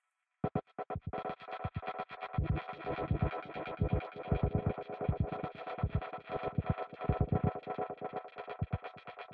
sampled guitar with delay 2
filter delayed guitar sample
delay, filter, guitar, sample